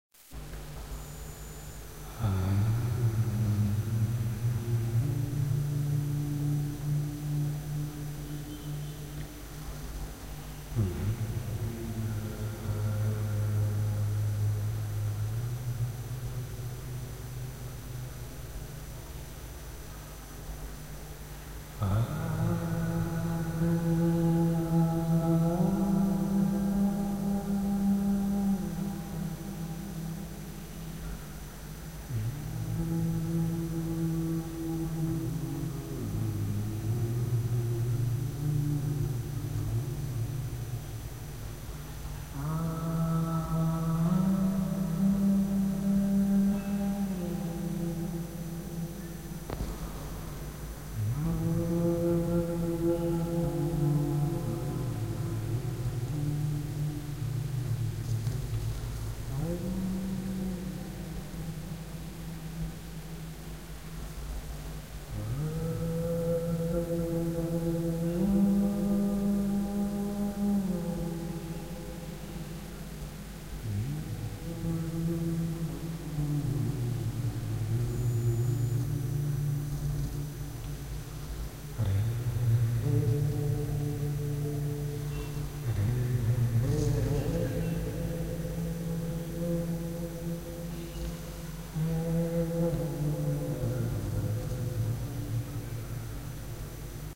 Content warning
Experimental aalap in Indian music. Sound is recorded on simple mobile phone during practice
sound, Swar, Vocal, Classical, reverb, effect, soundeffect, 4x4-Records, aalap, J-Lee, Music, Hindustani, Indian, FX, sound-effect